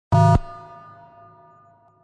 Alien-like sound from an alien phone.
Square wave sound with ring modulation and reverb.